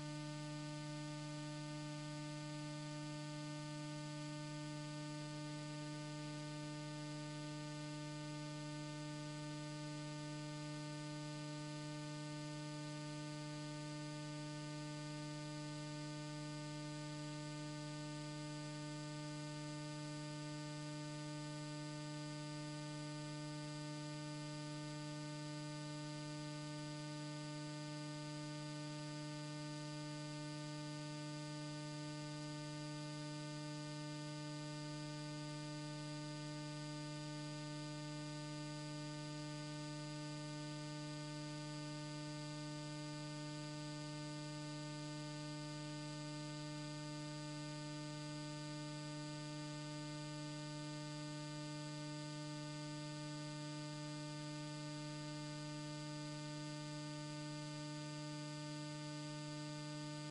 DV tape noise
The full 60 minutes caused me some download problems - I figured I'd drop this here for anyone having a similar issue. A clip from their description: This is my recreation of the noise in the background of a video shot on a consumer grade MiniDV camcorder (a well used one).
camcorder, tape